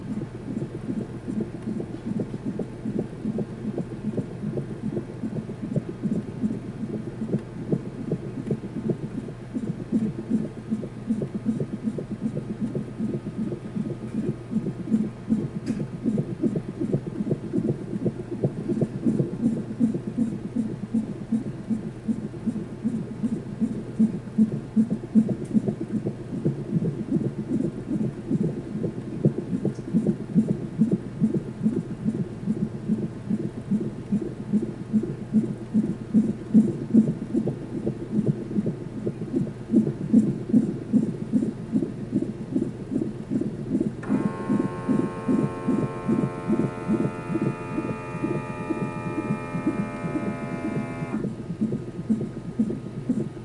baby heartbeat 0414 999bpmachine
Sounds leading up to the birth of a baby recorded with DS-40.
birth, baby